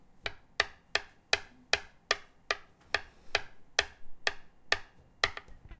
pensil beat on school bench